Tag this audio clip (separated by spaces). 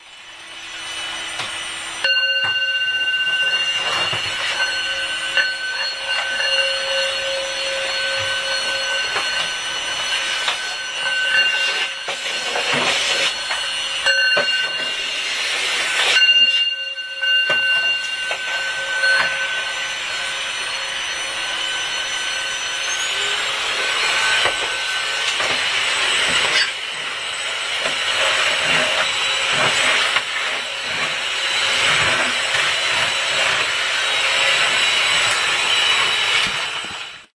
vibrate vibration field-recording tibetan-bowl instrument domestic-sounds noise